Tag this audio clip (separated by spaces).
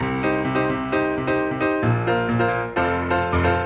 132 beat big bigbeat funk funky grand grandpiano klavier loop piano steinway steinweg